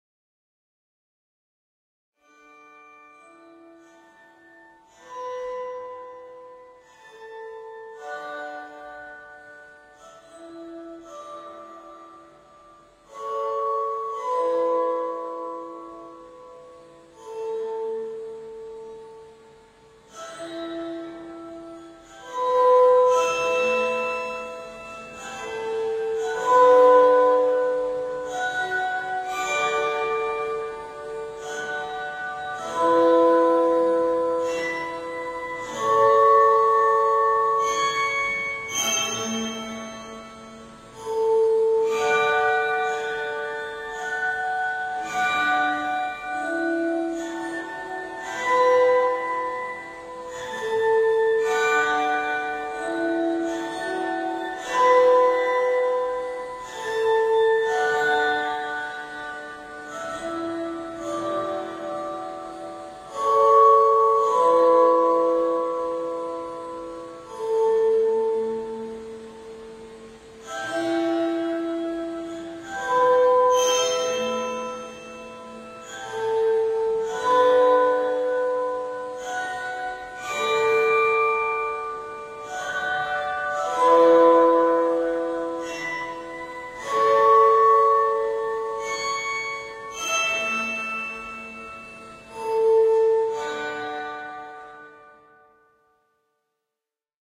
Music Box Test1
A collection of creepy music box clips I created, using an old Fisher Price Record Player Music Box, an old smartphone, Windows Movie Maker and Mixcraft 5.